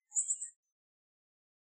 Bird Double Chirp
This sound is of a bird chirping twice in quick succession.